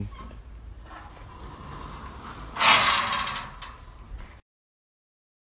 sliding gate
A motorized gate opening on manual and scratching the gear.
OWI, steel, gate